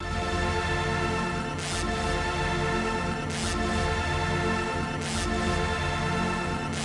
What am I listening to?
140-bpm dupstep sound fx 6
140 bpm dubstep sound fx
sound-fx, dubstep, 140-bpm